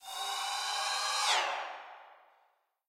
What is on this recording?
cymb shwish 27
cymbal hit processed with doppler plugin